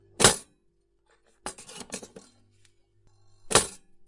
Toaster is ready
The toaster has toasted some bread!